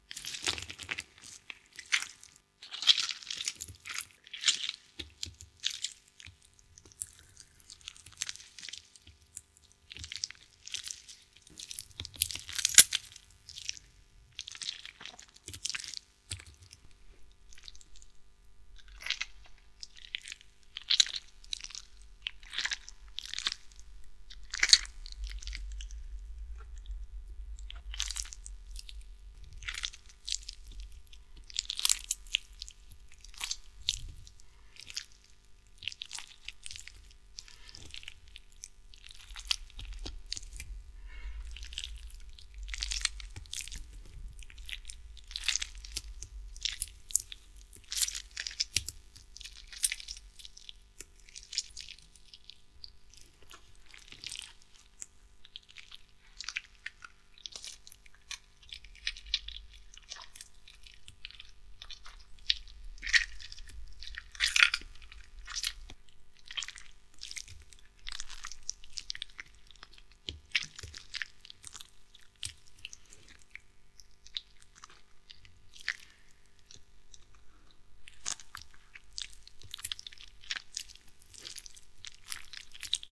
Blood Drips Tomato
Studio recording of tomatos being squished. Very good for organs stab effects and so on.
blood, drips, sfx, squish, vegetables